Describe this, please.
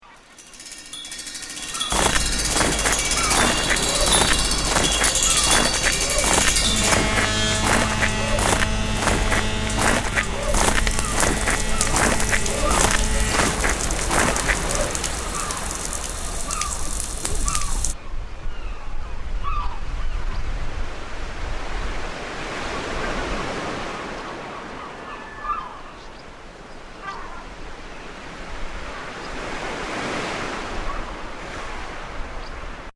SonicPostcard HD Heidi&Hetty
Here is Heidi & Hetty's composition, Heidi and Hetty worked realy hard on this, they made some fantastic recordings and have edited this really well. They have used some sound effects in here but not many - Have a listen and see what you think. Can you identify the sounds and which ones were effected?
humprhy-davy, sonicpostcard, cityrings, Heidi, Hetty, UK